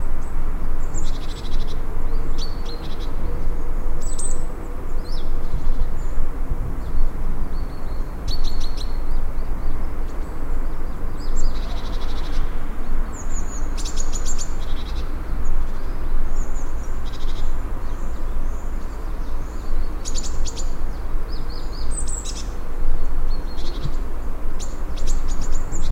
forest ambient 01 loop
Forest ambient loop. Recorded with Audio-Technica AT2020.
ambient, forest, loop